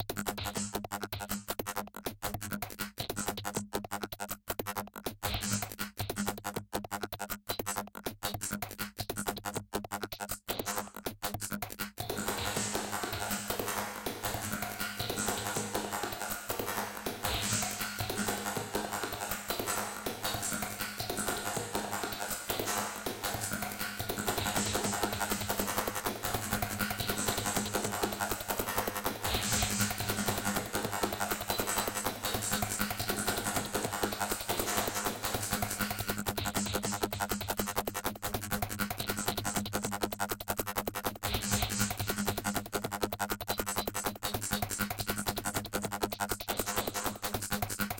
Some rapid processed percussion